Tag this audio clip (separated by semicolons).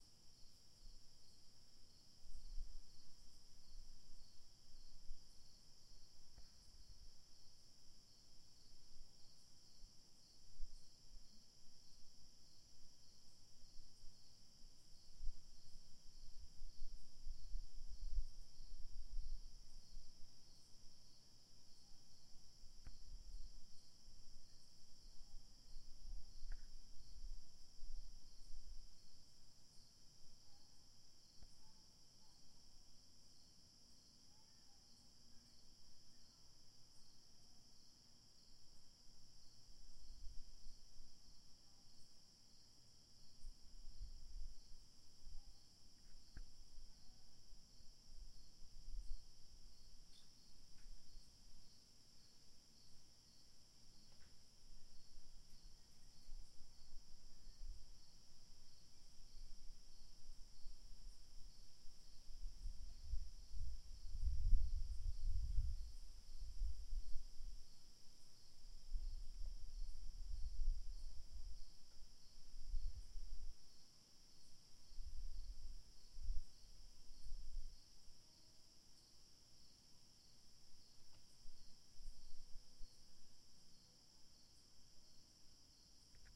porch; outside